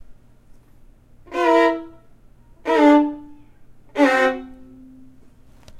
Low tritone slide down
A tritone sliding down using the lower register of a violin. I would consider it as a confused character sliding down or falling down. Can even be applied to a scary scenario or a crossroads.
confused, down, dream, fail, sad